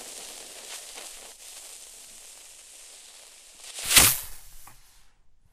A buzzing bee type of firecracker set of with a fuse and then spins away.
bee, buzzing, firecracker, firework, fizz, fuse, spinning, stereo, wind